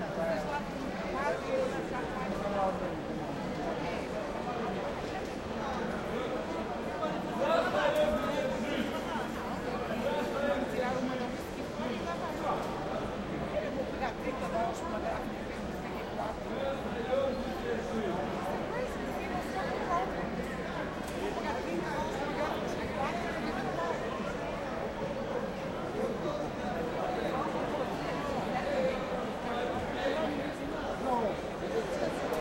airport lounge waiting area busy Havana, Cuba 2008